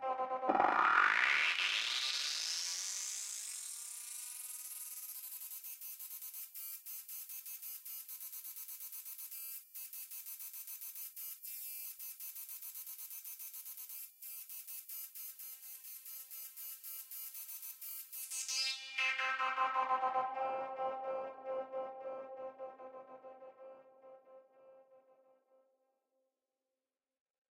Turn on search device
Turn on electrical search device wich has a power valve amplifier to find navies about 5 light-years near.